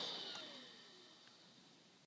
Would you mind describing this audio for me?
This is the sound of an old MSI computer shutting down. This sound has been recorded with an iPhone4s and edited with gold wave.